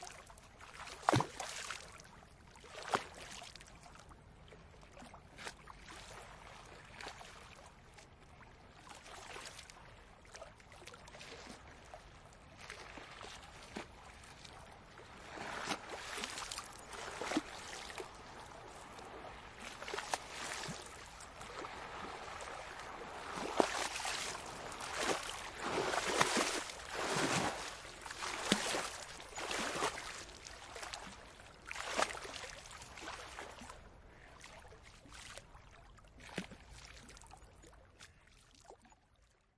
Erster Test: Wellen am Rhein in Mainz
First test: wave at the rhine (mayence)